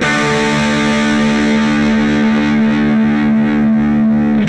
Power chords recorded through zoom processor direct to record producer. Build your own metal song...
chord, electric, guitar, multisample, power